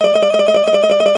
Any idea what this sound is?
Wiggle audio 2 - Pitched up higher - Wiggly jiggle wiggle sound, spider on elastic. Little jiggle, toes wiggling, cartoon style.
Created using GarageBand's Internal synth. Apple iMac. 14.10.2017